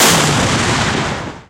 Explosion, short [sidohzen 165808]
A short edit of sidohzen's wonderful Explosion with derbis - authentic. 4kg TNT recording.
Edited with Audacity: Cut, fade in/out, normalize.
annihilating, c4, wreck, wrecking, annihilation, bang, explode, dynamite, demolish, tnt, boom, annihilate, explosion